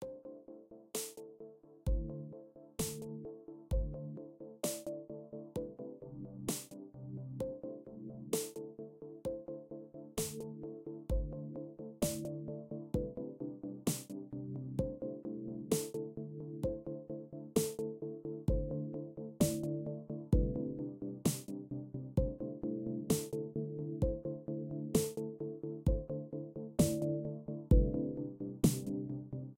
short ambient loop i made with figuring out what filters are, set at 130bpm with a futuristic sound to it even though the instruments used are the grand piano and cellos
130-bpm, atmosphere, background-sound, Loop